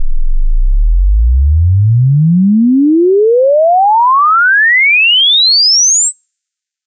Sine Waveform Sweep from 0 to 16 kHz. This was just an excuse to see the pretty colours in the waveform preview :) Made with Sytrus software synthesiser in FL Studio at 64X oversampling.